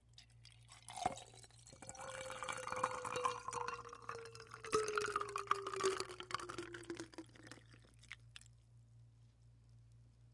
Pour into Glass With Ice FF364
Continuous pour of liquid into glass with ice, pouring until glass is full, ice and liquid hitting glass
liquid, glass, ice, pour